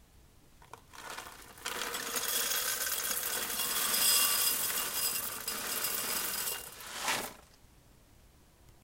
HouseholdSamples Coffee Beans
A recording I made of coffee beans being dumped into a glass grinder carafe.
ambient, beans, coffee, field-recording, percussive